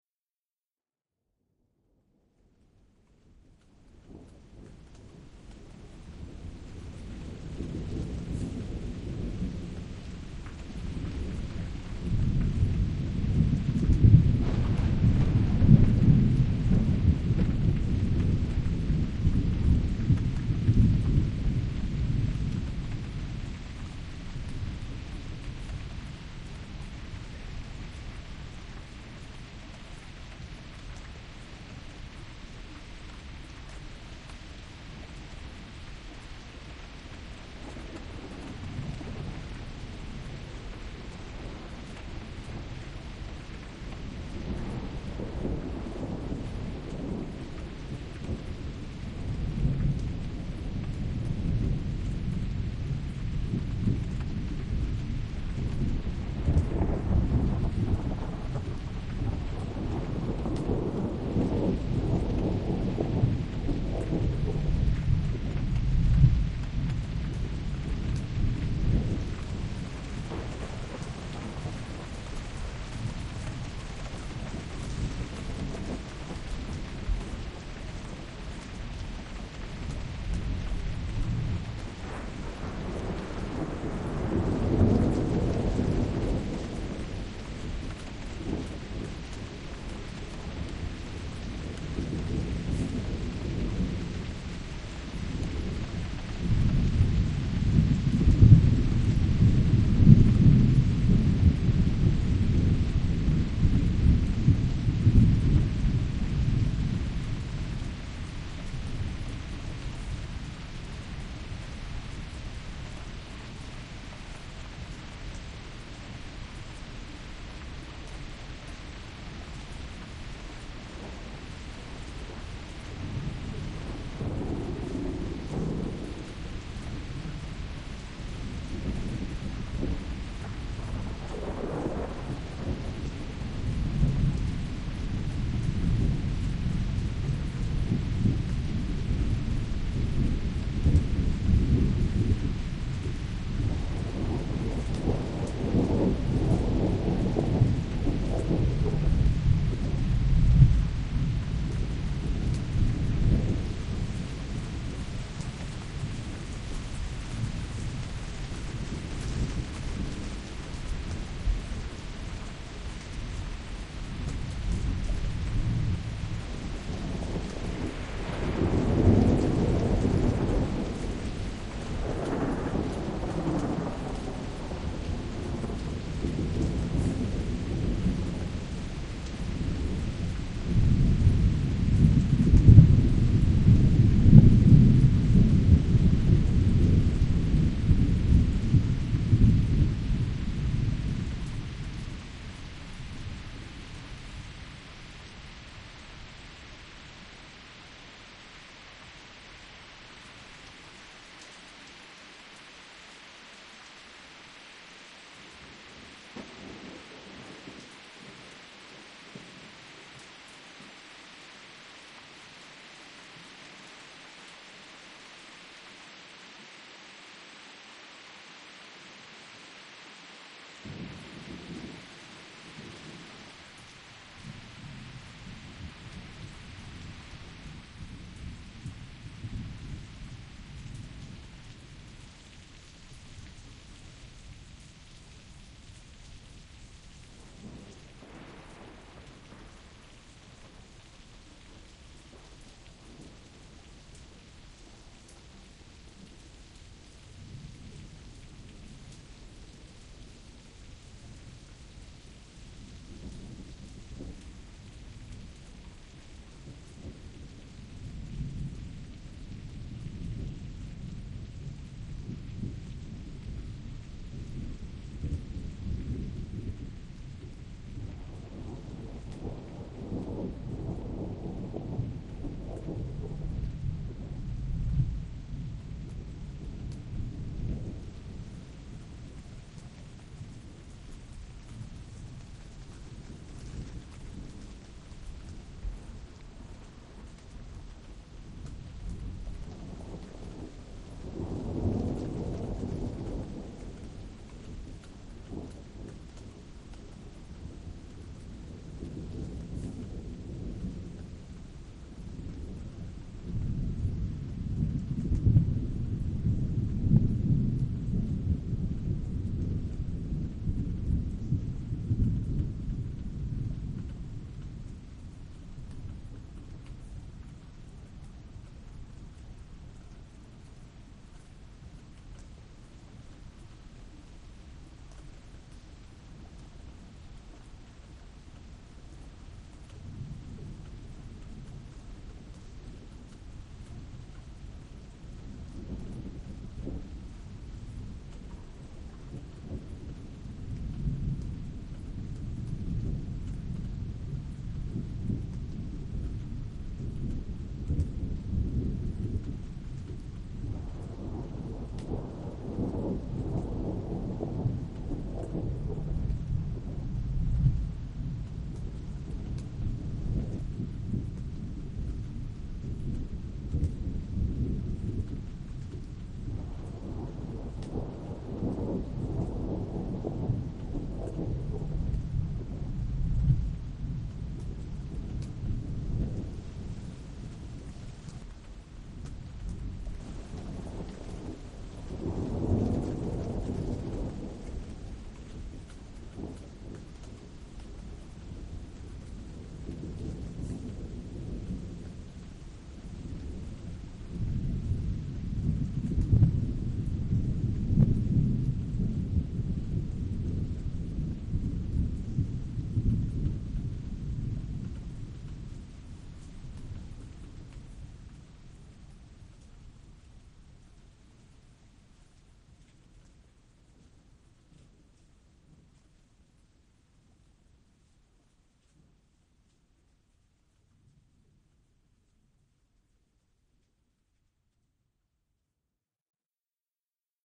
This is my first submission. It's just a remix combining 4 different thunderstorm samples from this site. Primarily based off of Martin Lightning's excellent sample remix. I mixed the samples on an Yamaha AW4416 in stereo. It fades in quickly and then becomes quite intense. After several minutes it subdues somewhat.